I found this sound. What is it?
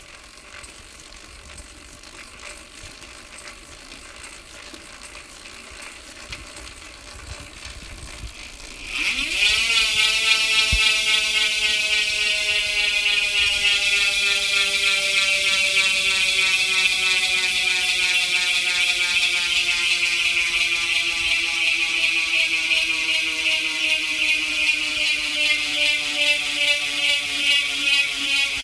BMX bike pedaling and wheel hub spinning or fishing reel
High performance BMX racing bike pedaling and then freewheel gears loudly spinning. This also sounds like a fishing reel and line being reeled in and throw.